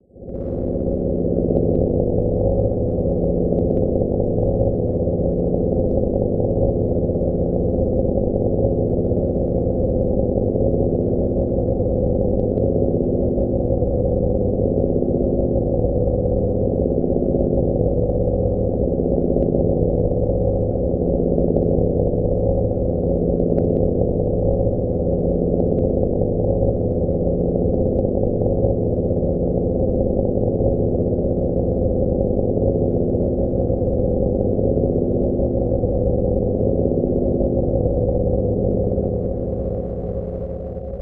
plasma engine fx
Engine "Humming" sound for many use. Use your imagination.